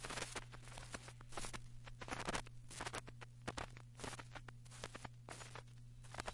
Snippets of digitized vinyl records recorded via USB. Those with IR in the names are or contain impulse response. Some may need editing or may not if you are experimenting. Some are looped some are not. All are taken from unofficial vintage vinyl at least as old as the early 1980's and beyond.
album, crackle, lofi, LP, noise, record, retro, surface-noise, turntable, vintage, vinyl